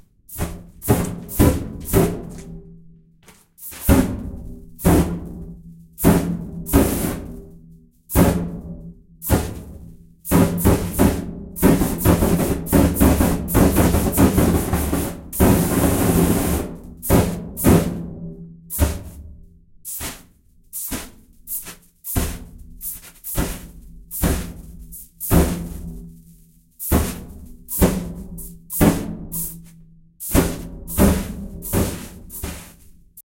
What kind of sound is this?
spray fire bursts 1
Field-recording of fire using spray-can with natural catacomb reverb. If you use it - send me a link :)